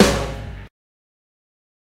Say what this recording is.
Incredible Snare Sample.